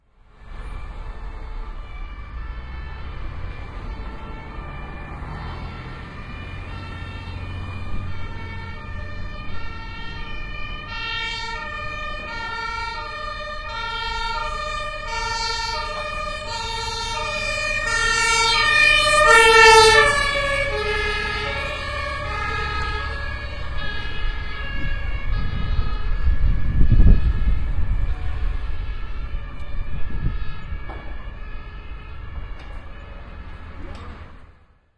feuerwehr-faehrt-vorbei
An ambulance with siren. Recording location is Berlin, Germany.
wind, ambulance, siren, doppler, berlin